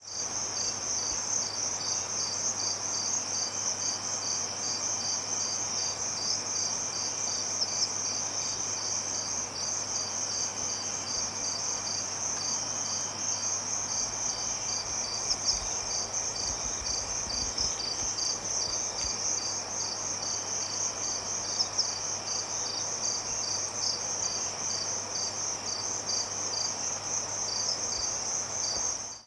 Grillons-Amb nuit
Some crickets during the night in Tanzania recorded on DAT (Tascam DAP-1) with a Sennheiser ME66 by G de Courtivron.
tanzania, night